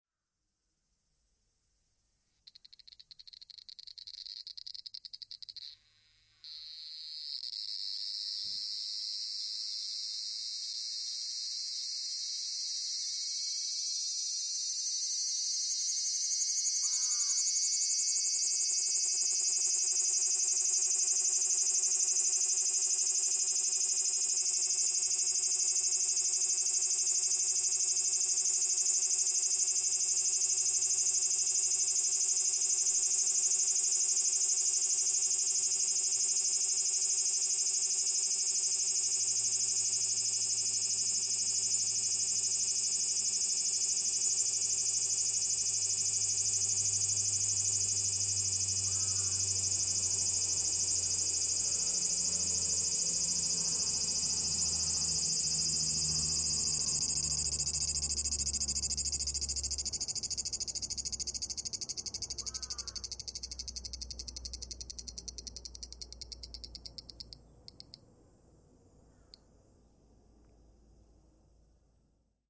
A single cicada lands on the window screen in Nagoya, Japan, 24.07.2013. Recorded with a Sony PCM-M10 placed at 10 cm of the cicada, you can hear all of cicada's 'singing' process.
Close-up, Summer, Cicada, Insect